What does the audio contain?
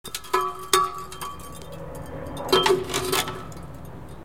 Sound taken during the international youth project "Let's go urban". All the sounds were recorded using a Zoom Q3 in the abandoned hangars U.S. base army in Hanh, Germany.
ambient, dark, deep, drone, effect, experimental, fx, germany, hangar, pad, recording, reverb, sampled, sound-design, soundscape, zoomq3